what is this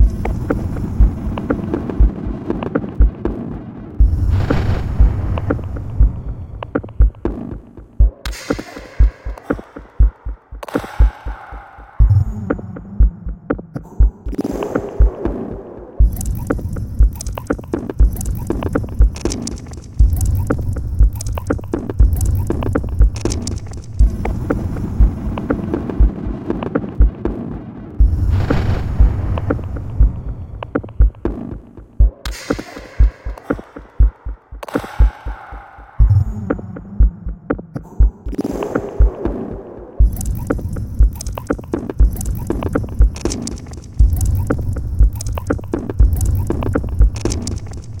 This is just the rhythmic part of this sound:
provided here separately in case anyone wants to use it or process it further.
All sounds heard on this loop are processed versions of these sounds:
The loop was assembled from 2 different smaller loops and processed further with a combination of filtered delays, granular processing and reverb.